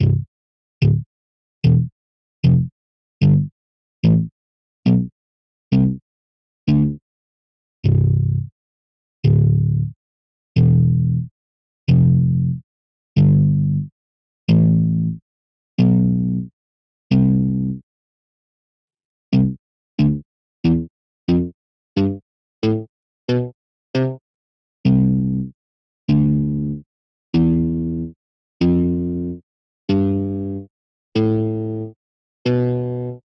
Bass Notes(Raspier) 003
This sample was made in Ableton 7, using Raspier V1.0 a bassguitar VST plugin, further processed through a bassguitar amp simulator (from the Revalver MkIII VST plugin).Raspier is a free VST plugin. I tried it out but could never get a convincing acoustic or electric guitar sound out of it. SO I lost interest and there it lingered for many months on my VST directory.More recently I found it again on the internet, but on reading the text on the webpage, it was described as a bassguitar. I remembered I accidentally had got some nice bass sounds out of it when I first tried it so I gave it another go.The results are in this sample pack, and I think they are quite good.For best results you want to put it through a Bassguitar Amp + cabinet simulator.
electric, dry, bass, bassguitar